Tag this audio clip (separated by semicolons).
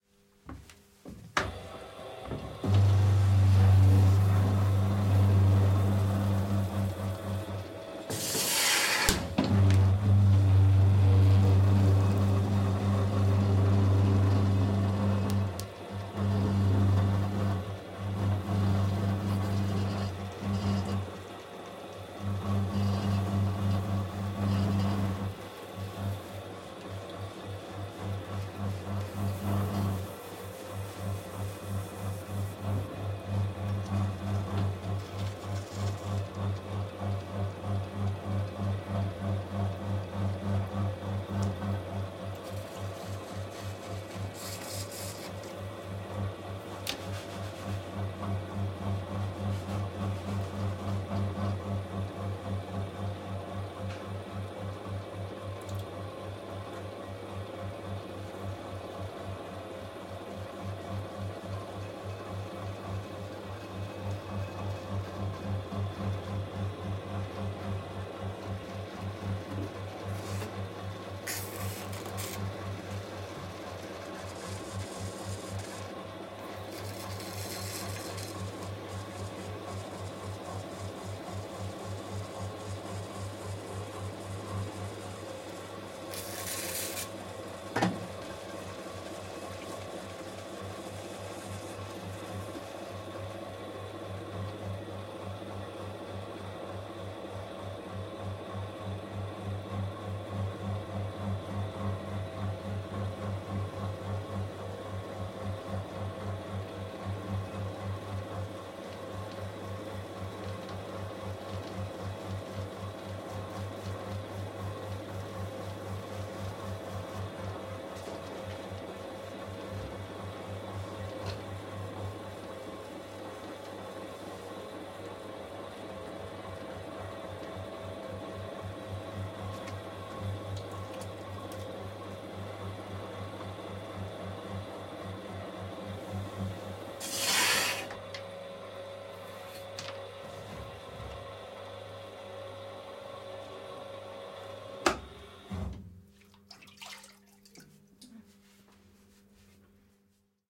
Maschine; Senheisser; 416; Sound; Circle; Devices; MKH; Field-recording; Pottery; Circular